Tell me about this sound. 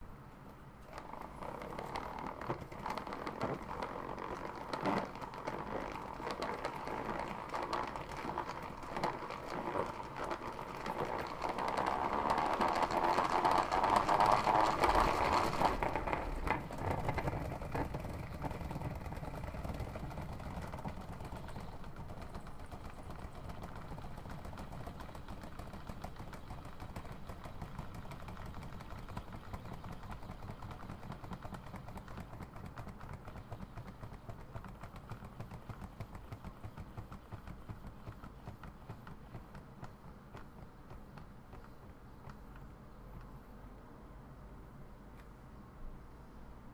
A plastic salad spinner.
spin, whirl, twist